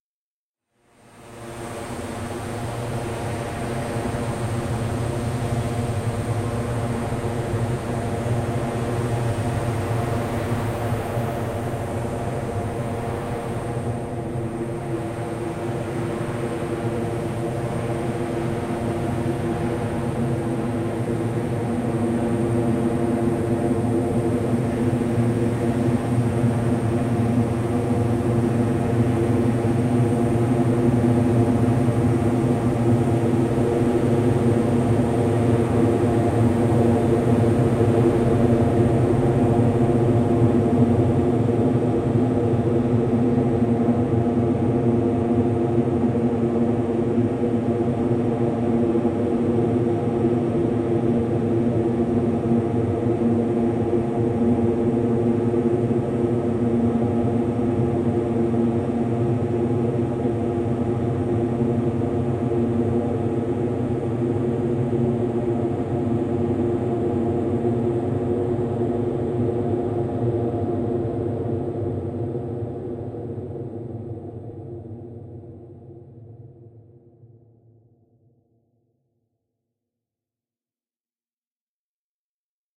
LAYERS 003 - Helicopter View - A#2
LAYERS 003 - Helicopter View is an extensive multisample package containing 73 samples covering C0 till C6. The key name is included in the sample name. The sound of Helicopter View is all in the name: an alien outer space helicopter flying over soundscape spreading granular particles all over the place. It was created using Kontakt 3 within Cubase and a lot of convolution.
artificial, drone, helicopter, multisample, pad, soundscape, space